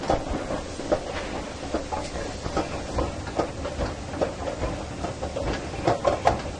Escalator, looped
The sound of an escalator in a swedish mall. Sound is looped.
From old recordings I made for a project, atleast ten years old. Can't remember the microphone used but I think it was some stereo model by Audio Technica, recorded onto DAT-tape.
looped, loop, escalator, mall